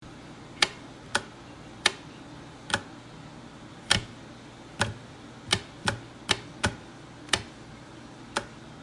Flipping Light Switch
flipping a lightswitch